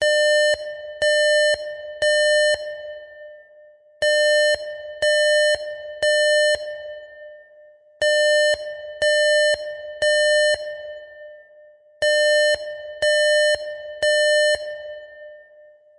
Emergency alarm with Reverb
every beep is 600 Hz, played 3 times every 3 seconds with a 1-second delay until loop with added reverb, generated/created with Audacity (LOOPABLE)